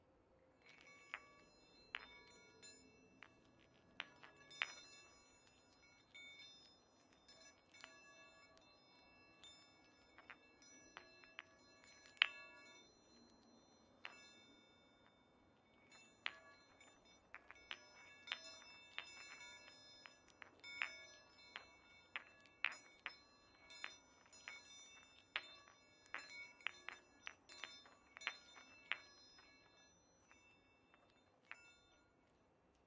Chinese stress balls
Playing with Chinese meditation balls
stress-balls, meditation